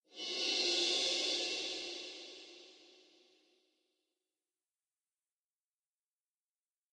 Orchestral Cymbals 2
Just made crashes sound more like an orchestral assembly with mixing crashes and reverb. Its all free enjoy.
FREE
assembly, Crash, Cymbal, Cymbals, Drumming, Drums, Orchestral, Theatrical